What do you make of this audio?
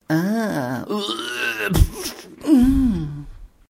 Begeisterung endet in Übelkeit
admiration which ends in nausea
admiration, sickness, sick, disgust